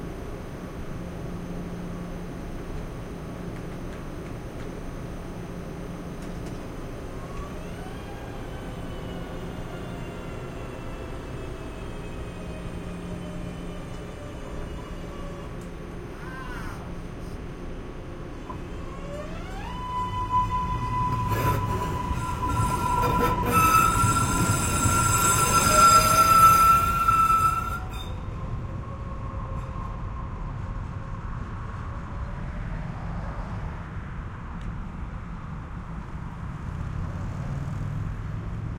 Exterior recording of a T train departing Coolidge Corner with street noise.Recorded using 2 omni's spaced 1 foot apart.